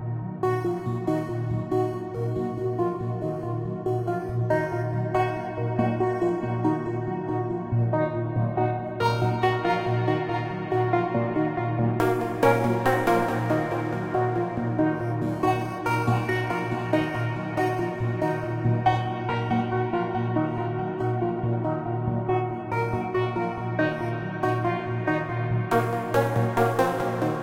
mel140bpm
made in sylenth1
140bpm, ambient, arp, atmo, atmosphere, dance, dubstep, effect, electro, electronic, loop, melody, noise, scifi, sequence, sound, space, synth, techno, trance